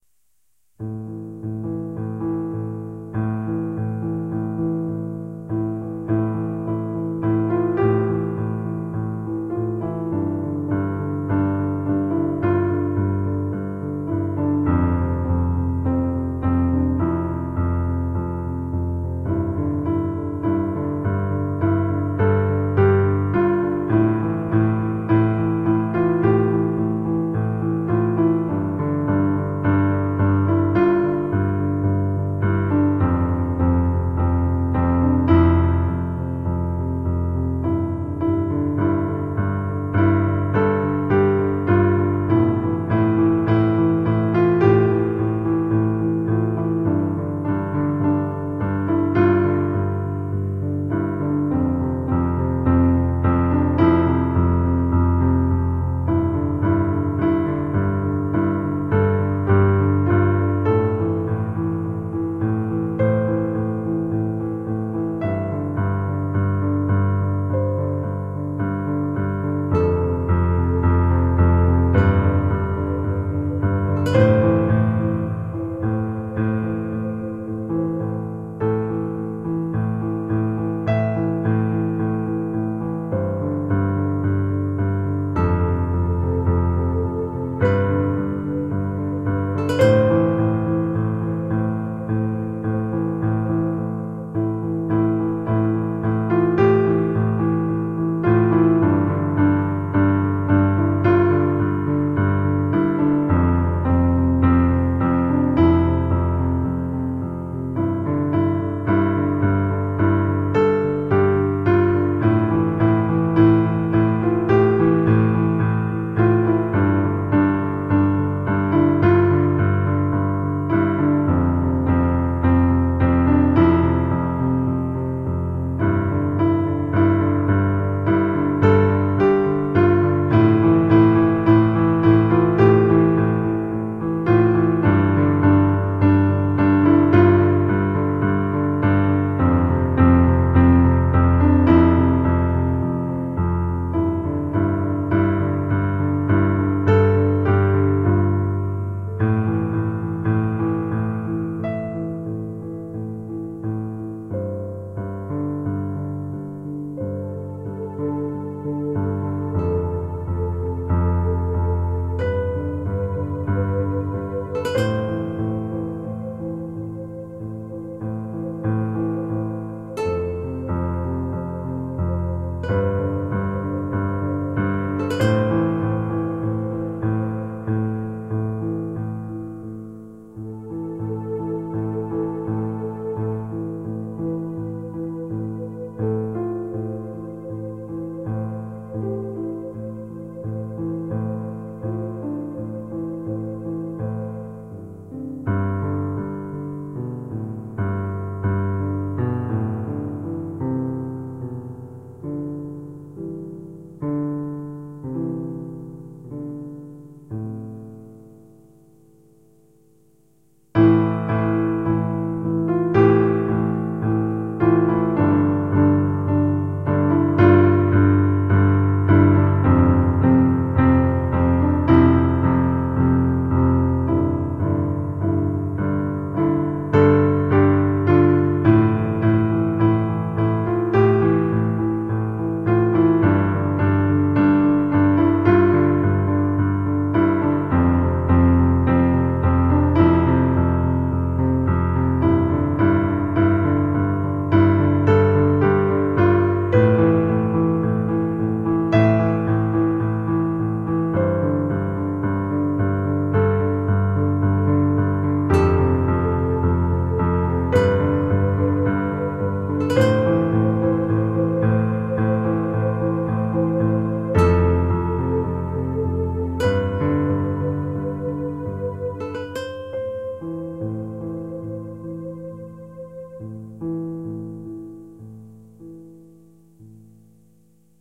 "Faded Expressions" music for your project
Faded Expressions is an ambient piece of music that I created - and you can use it for your projects. This has always been one of my favorites and I now give it off to you and the creative minds out there. Hope you can do something with it.
to credit, credit
Nicholas "The Octopus" Camarena.
Nicholas "The Octopus" Camarena
ambient, cinematic, dramatic, expressions, faded, free, music, piano, soundtrack, tragedy